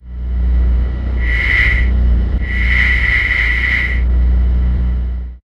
wind combined
The simulated stinging sound of a bitter wind as it blows across your brow on a winter morning, combined with a thematic backdrop loop.
white-noise, wind